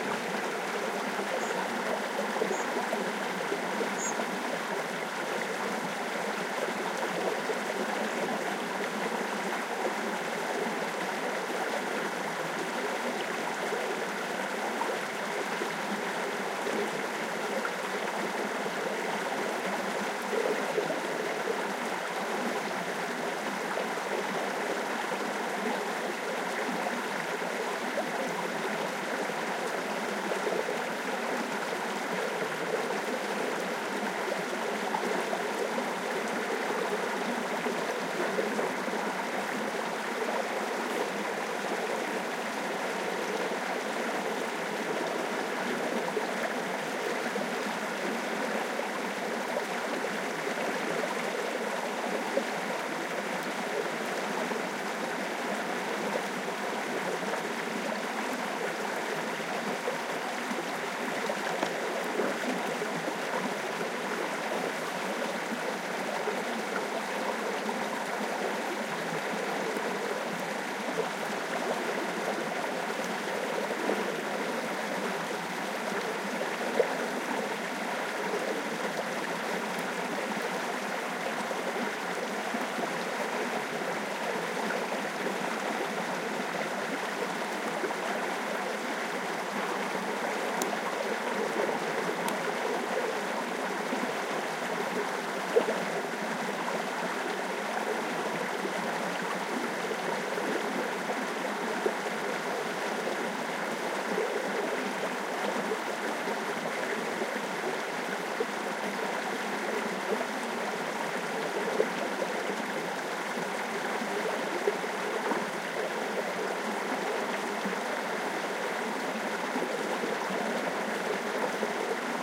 noise of a small stream. Recorded with Primo EM172 capsules inside widscreens, FEL Microphone Amplifier BMA2, PCM-M10 recorder. Near El Hoyo (Ciudad Real, Spain)
field-recording, Spain